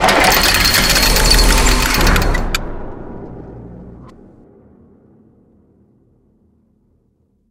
Metal warehouse door opened with chains
A large metal gate with chains being opened recorded with a zoom H6
open, gate, Metal, clank, close, door, chain, warehouse, opening